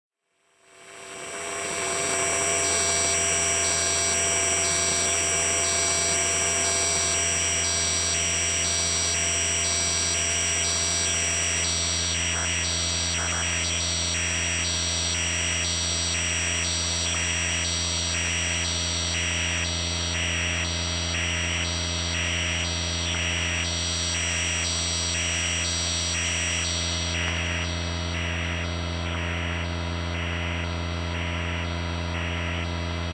Radio Noise + SquareRez
some "natural" and due to hardware used radio interferences
interferences, radio